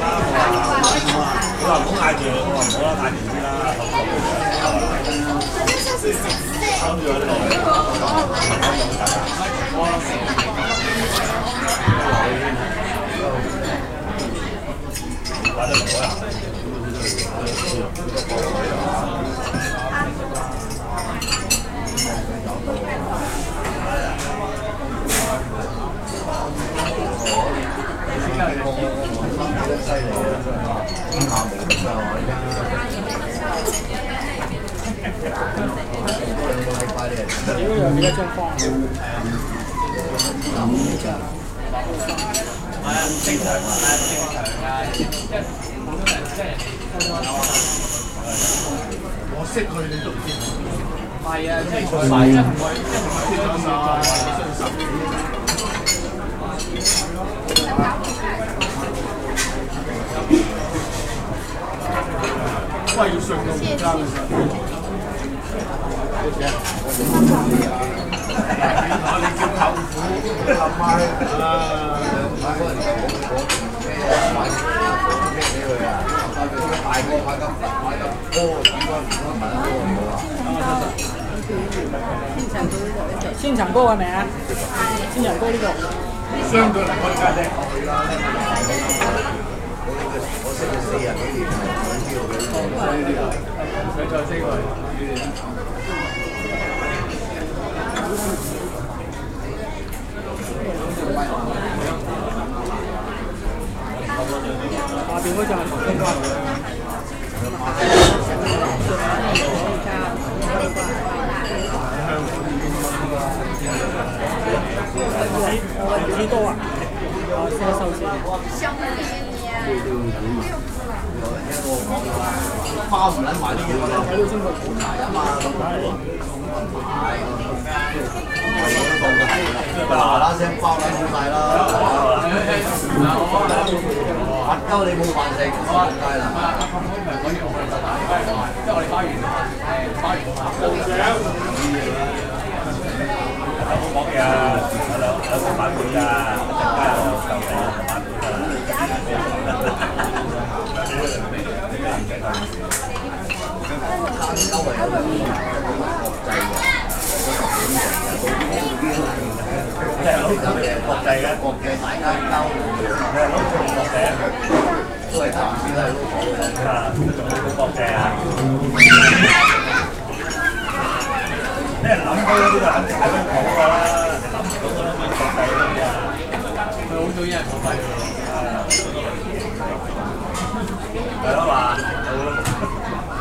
Mono recording of the ambiance of a crowded chinese restaurant. Sound of waiter packing ceramic plates, cups and chopsticks can be heard. Recorded on an iPod Touch 2nd generation using Retro Recorder with a capsule mic.